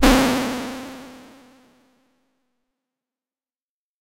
Tonic Noise Burst 3
This is a noise burst sample. It was created using the electronic VST instrument Micro Tonic from Sonic Charge. Ideal for constructing electronic drumloops...
drum, electronic